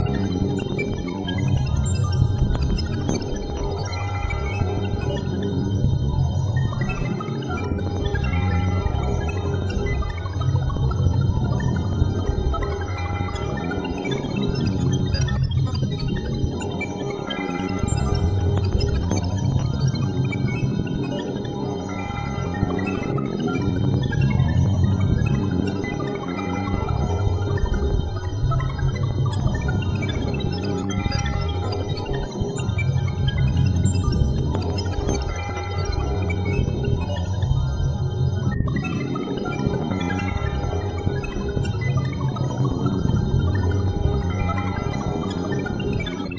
Hidden Track #13
All sounds and samples are remixed by me. A idea would be using this sound as a hidden track that can be heard on some artists music albums. e.g. Marilyn Manson.
hidden, atmosphere, noise, track, electric, ambience, effect, sample, processed, voice, experimental, vocoder, alien, end, distortion, weird, electronic